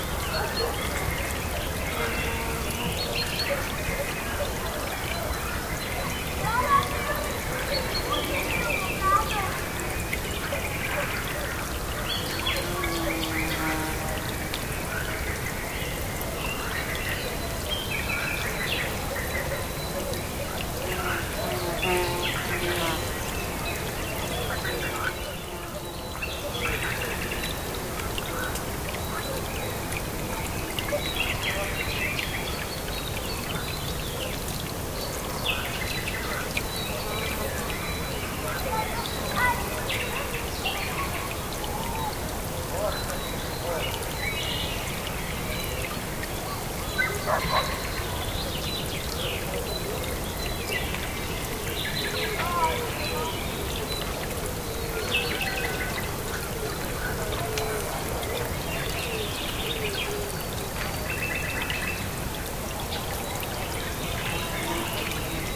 Creek in park, with bees and other insects passing by. Some people walking near could be also heard.
bee, creek, field-recording, flow, nature, river, small-river, stream, water